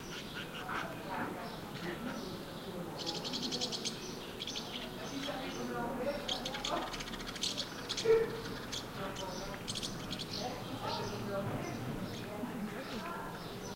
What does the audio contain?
House Martin 2
The harsh sounds of house martins nesting under eaves in the delightful French village of Collobrieres.
bird, field-recording, house-martin, martin